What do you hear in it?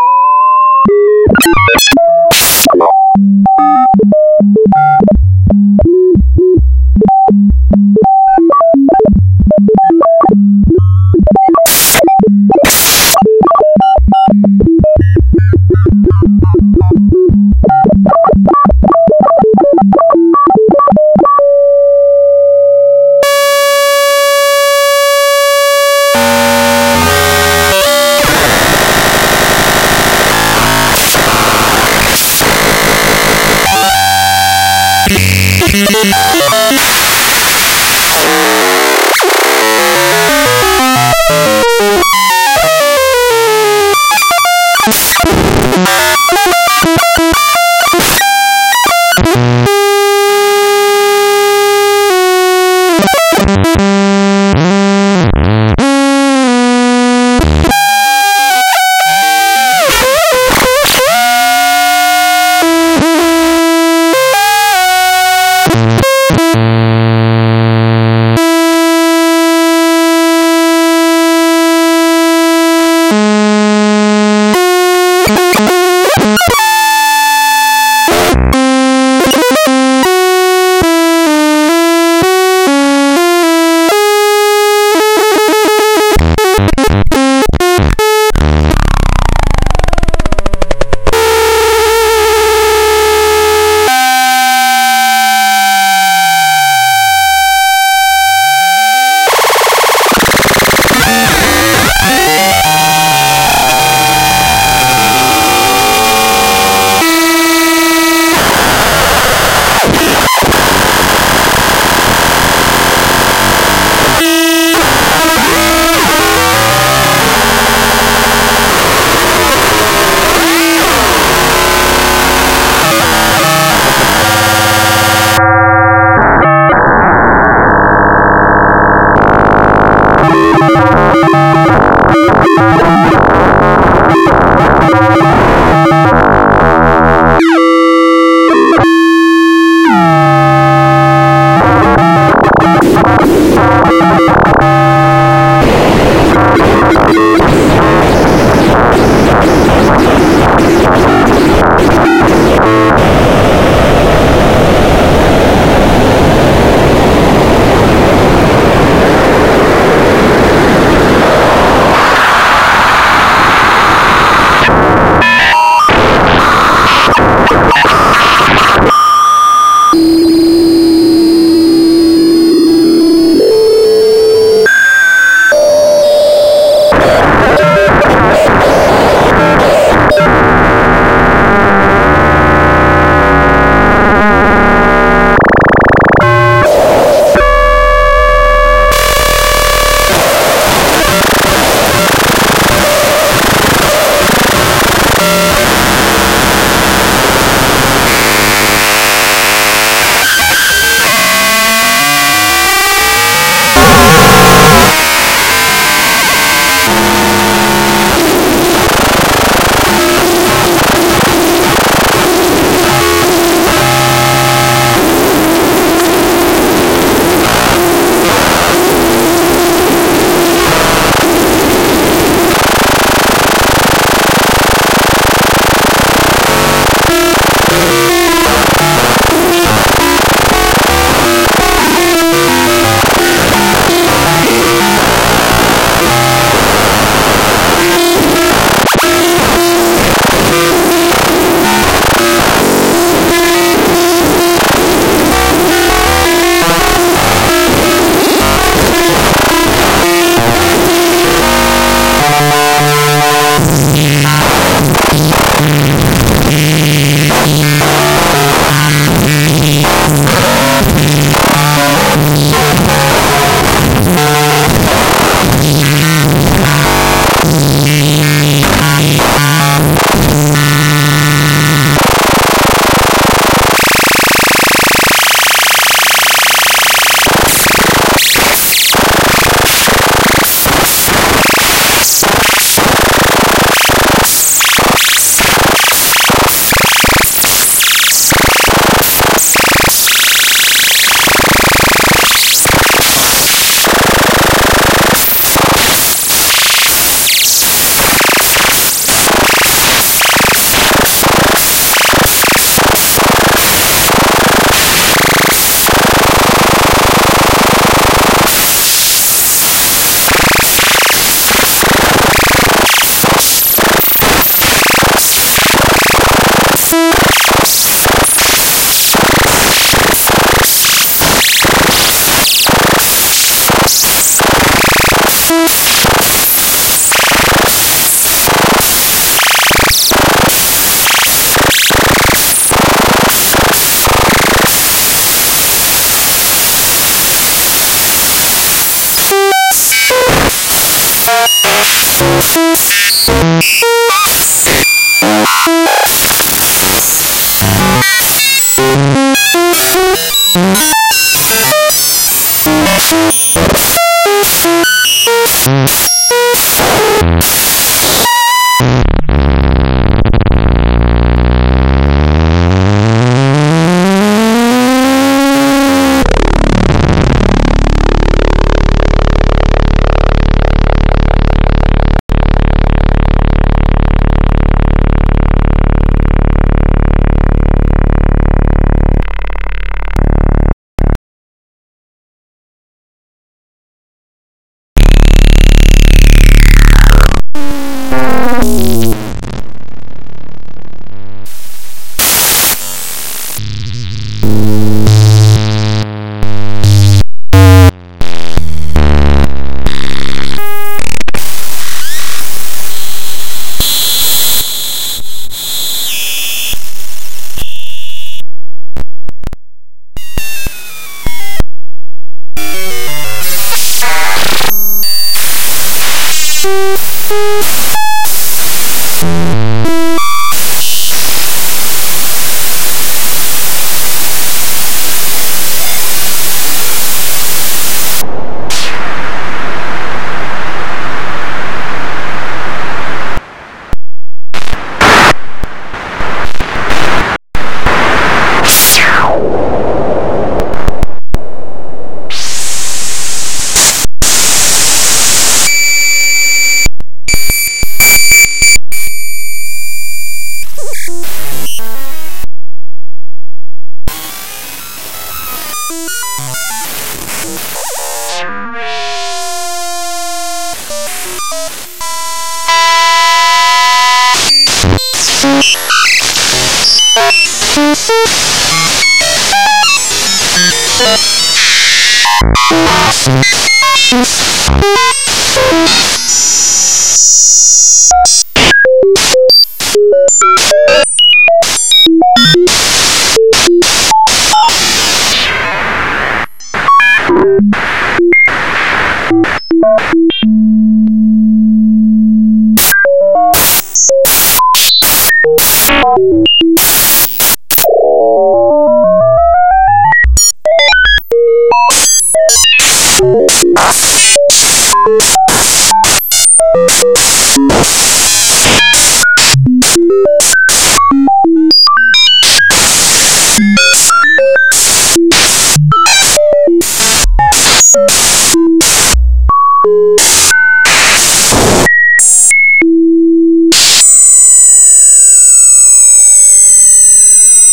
homemade waveshaper test
I made a waveshaper in the JS audio language that applies random math operations to the signal. The original signal is Liteon's (of Reaper forums) Lorenz attractor synth, which is then run through the waveshaper, then that signal controls the pitch of a soundwave. Later on I waveshape the resulting soundwave but I don't think it has the best results (DC offset city). A lot of the cooler sounds/series of pitches are made by changing the number of operations on the fly, or shuffling a new set of operations for the waveshaper to use